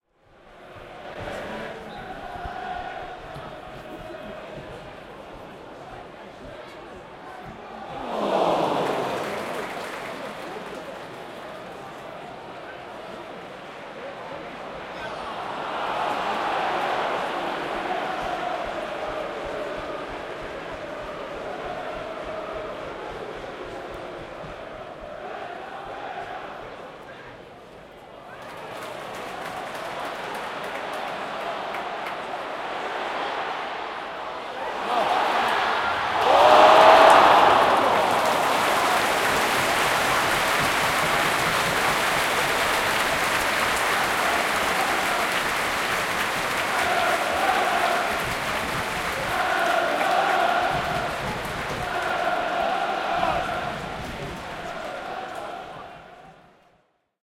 Football Crowd - 3 Near misses- Southampton Vs Hull at Saint Mary's Stadium
Recorded at Southampton FC Saint Mary's stadium. Southampton VS Hull. Mixture of oohs and cheers.
Football, Stadium, Large-Crowd, Boo, Football-Crowd, Cheer, Southampton-FC